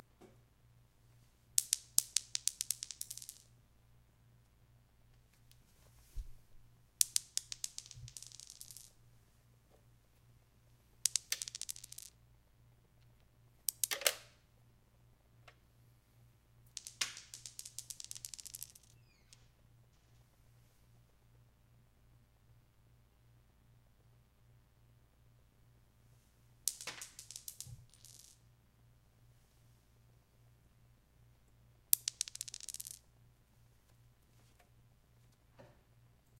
Me rolling an individual die on ceramic tile floor and against various 3rd party surfaces (mixer, wooden baffle frame, mic stand). Recorded at various directions towards, away from and across the path of the B1 microphone. If you want two dice, copy and paste different rolls and put them together with one panned slightly left and one panned slightly right. Good luck and safe betting.
roll, dice